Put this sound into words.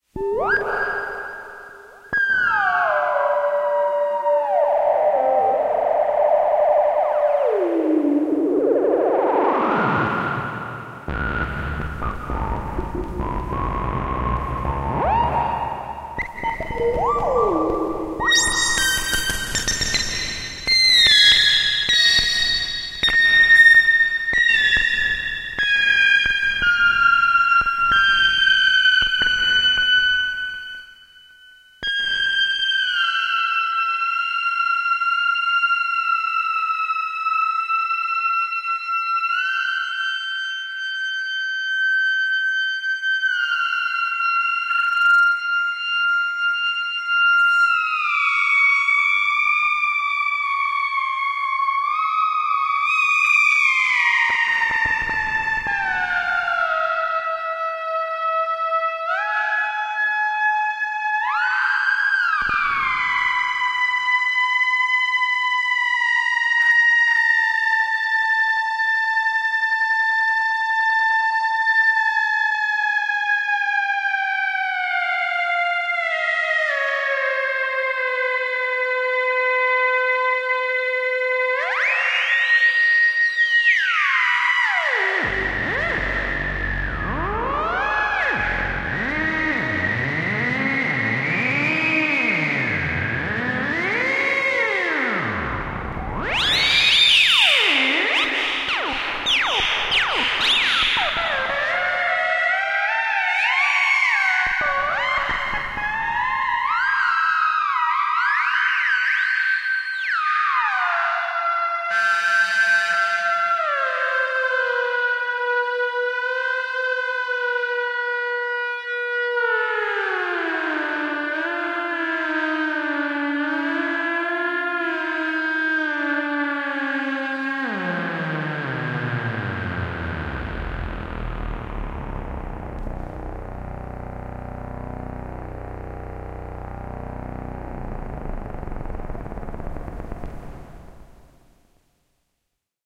A monotron solo (aka noodling) with reverb added.
No noise-reduction or EQ has been applied.